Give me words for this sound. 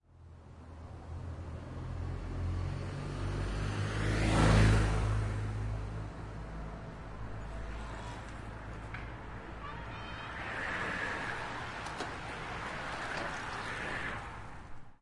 bike race 05
A men's bike race. First the pacing motorcycle goes by, then the lead racer, then the rest of the racers. There is a small amount of cheering from the crowd.
Recorded with a pair of AT4021 mics into a modified Marantz PMD661.
cycle, bicycle, race, whoosh, stereo